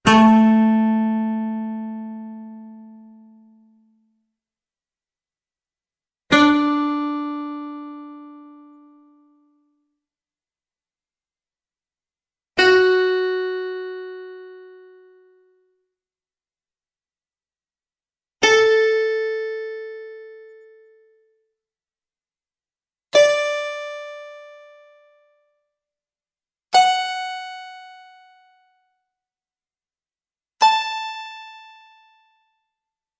Kanklės is a Lithuanian plucked string instrument . The sound is similiar to
a dulcimer or zither. I just used two short samples,to get a smooth and constant tone over the whole range.
Dulcimer, Hammered-Dulcimer, Kankl, Psalter, s, Santur